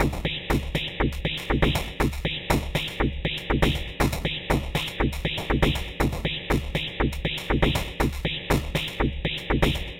Copyc4t loops 00
A simple loop @ 120 bpm created using sounds from this pack by copyc4t.
Cut out small interesting portions of copyc4t's sounds in Audacity, applied a low cut filter to remove anything below 100Hz and, in some cases, a fade-out.
These tiny sounds were then loaded into ReDrum in Reason and created a simple pattern (only 3 sounds). Some of the sounds were sent through effects: Digital Reverb, Scream4Distortion bitcrusher, Aligator sequenced filter.
All the sounds used for this loop came from this sample by copyc4at
120bpm, dare-26, image-to-sound, loop, loopable, percussion, picture-to-sound, seamless-loop